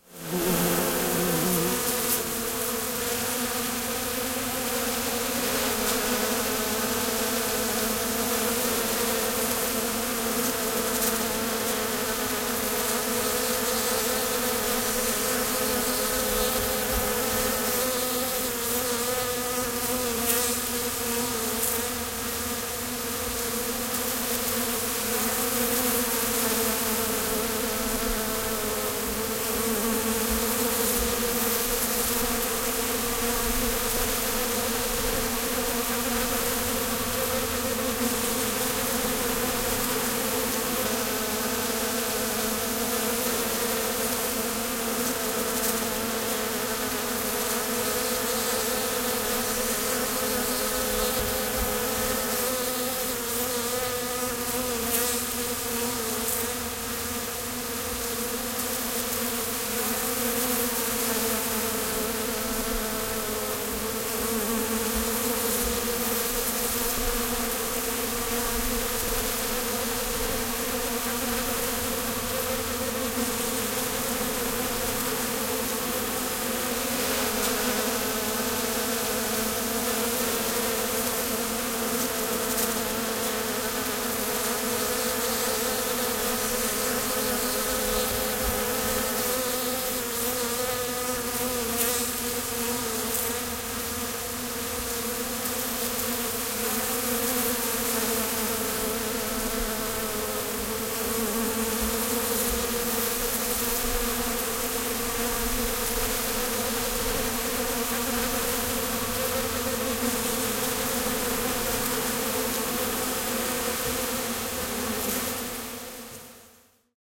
Kesymehiläiset, mehiläiset, surisevat, lentelevät ja keräävät mettä kukissa, mehiläisparvi, kesä. (Apis mellifera).
Paikka/Place: Suomi / Finland / Joensuu, Kaltimo
Aika/Date: 02.08.1975